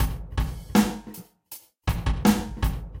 Simple 80bpm drum loop with room reverb.